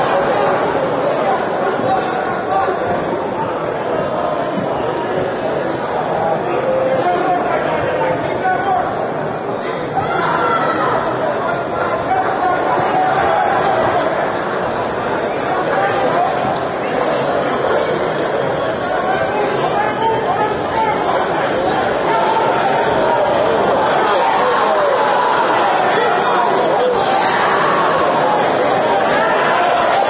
Fight Arena 2
Crowd noise at a MMA fight. Yelling and English cheering sounds. Part 2 of 5.
fight, fighting, wrestling, yelling, field-recording, arena, boxing, crowd, english, cheering, live, shouting, event, clapping